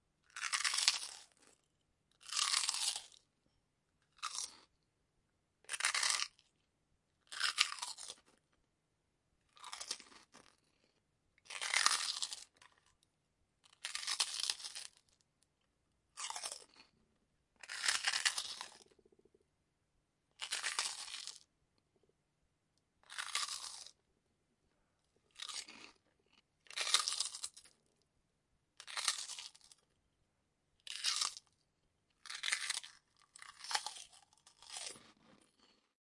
Biting, Crunchy, B
More raw audio of biting into crunchy and crispy prawn crackers. The chewing has been edited out, the sounds are simply each initial (and loud) bite of the cracker. I couldn't resist ordering another Chinese takeaway. The last three crunches are continuous without any editing break in between.
An example of how you might credit is by putting this in the description/credits:
The sound was recorded using a "H1 Zoom recorder" on 30th December 2015. (Happy new year!)
bite, biting, chew, chewing, crisp, crisps, crispy, crunch, crunching, crunchy, eating, food